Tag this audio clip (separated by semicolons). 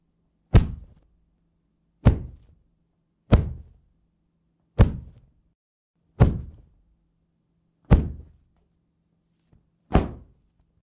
bass; boom; kick; low; thud; thump